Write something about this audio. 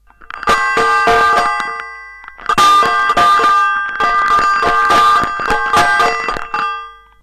All visitors to China use to buy those pair of elegant balls to keep in your hands and move in a way to cause relaxation. These balls have built in clocks of some kind, and they bell as soon as you move the balls